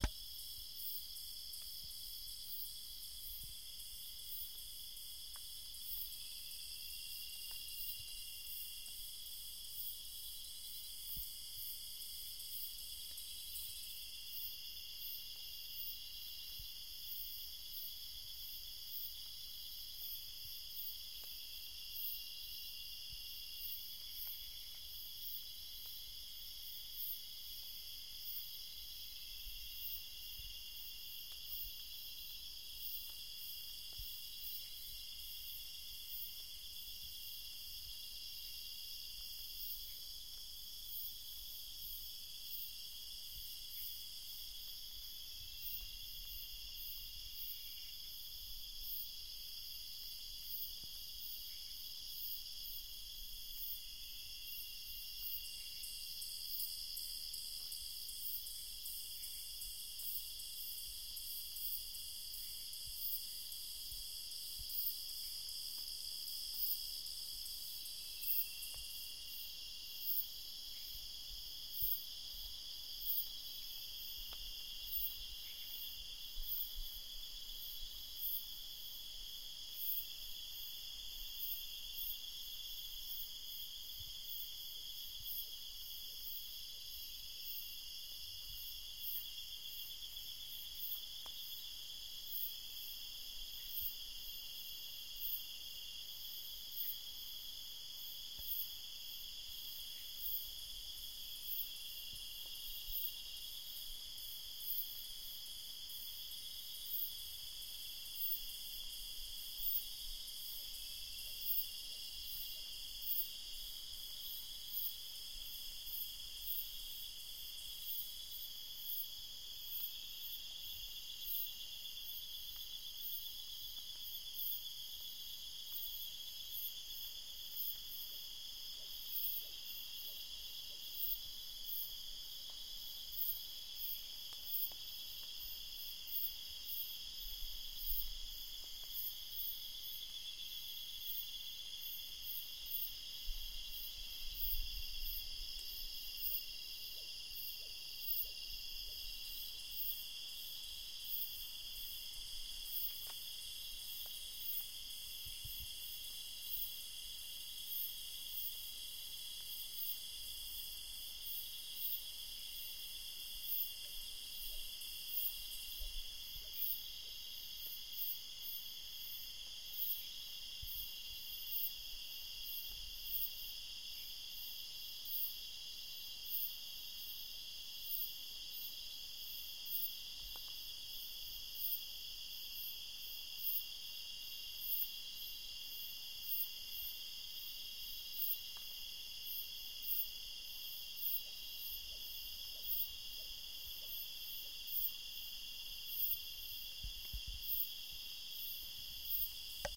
Night in nature 2
Night in the nature at Cuetzalan , Puebla. Mexico.
Take 2